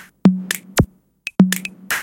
untitled3226165118-loop-118bpm-perconly
Tom, hat, some high-pitched triangle sounding thing.
beeps, loop